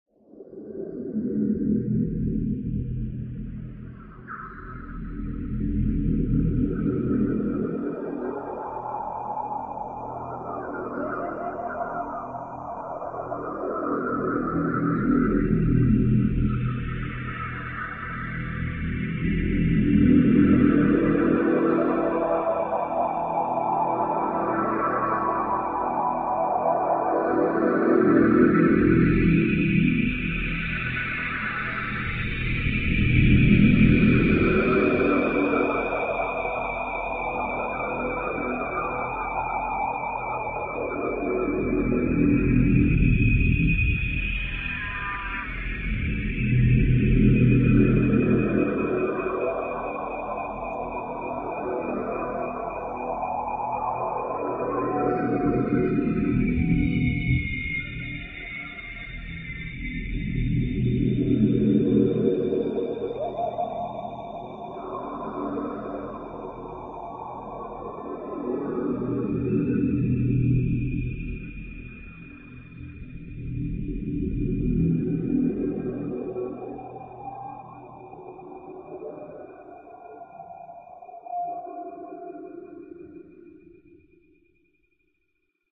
ambience on planet Zambiana
ambient, synth